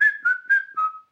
Alexia navarro02
Description de base: Whistlings on a bed recorded in my room.
Typologie (P. Schaeffer): V'' itération variée
Morphologie:
-Masse: Son cannelés
-Timbre harmonique: éclatant
-Grain: lisse
-dynamique: attaque franche
-profil mélodique: variation scalaire
-Profil de masse: peu de basse
Alexia, Audacity, Lyon3